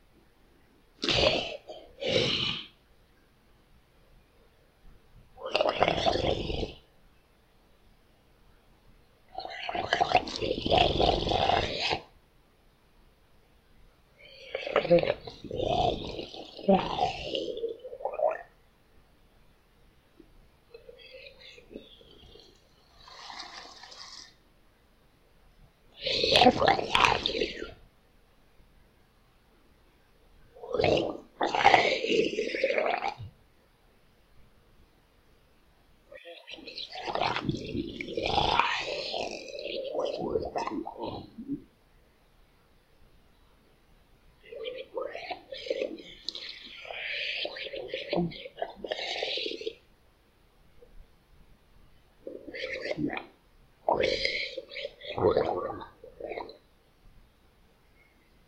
I recorded myself gurgling on some refreshing cold water, then I edited it with some distortion and low-pass filters.
This is actually 10 different gurgle sounds. You may use any combination freely. Credit to me is optional.